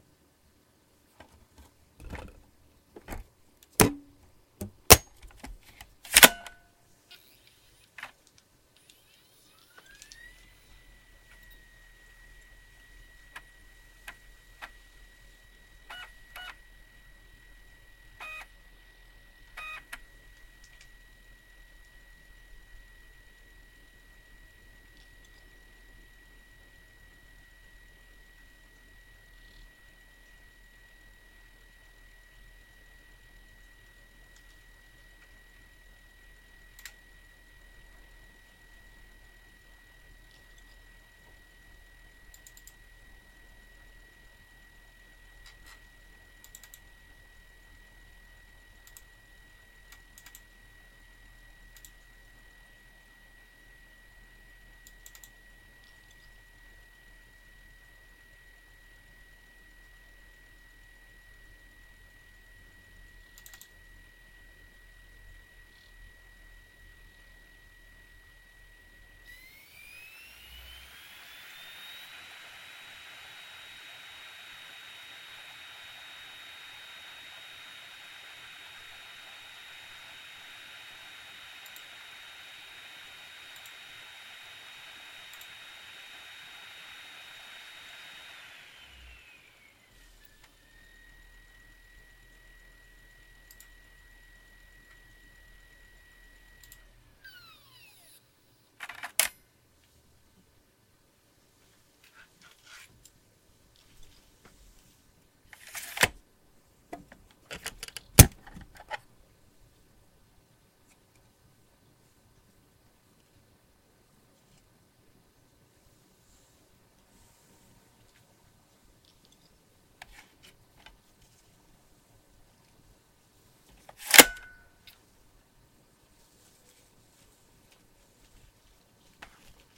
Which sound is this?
reading software off of DVD, hard drive noises, light fan noises, mouse clicks
Inserting, reading, launching a program, and ejecting a DVD-R in a USB disc drive (repackaged "hp DVDRAM GU90N" laptop drive) with the occasional rumble of a 2TB 7200RPM internal hard drive (in an enclosure) and mouse clicks. computer fan may be audible in background. Contains seek noises.
recorded on Blue Yeti in omnidirectional mode and denoised in RX 8 (drive whine re-enhanced though as noise removal made it quieter)
Labels added to audio in FL Studio Edison
cd, cd-r, cdr, cd-rom, cdrom, click, computer, denoised, disc, disc-drive, disk, disk-drive, drive, dvdr, fan, hard-drive, hdd, hp, motor, mouse, mouse-click, vibrating, yeti